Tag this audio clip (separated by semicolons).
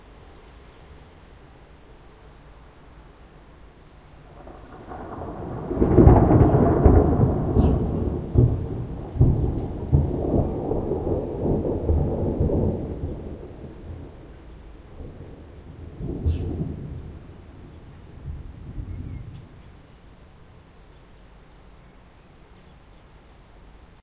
nature; field-recording; streetnoise; thunderclap; thunderstorm; thunder; rain